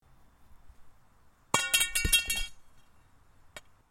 metal pipe foley
Some metal object dropping onto concrete in our garden.
dropped, clang, pipe, crash, metal, drop